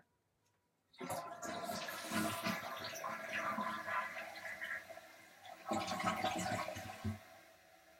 flushing toilet
To flush a toilet
toilet, llave, ba, sanitario, flush, la, flushing, o, bajar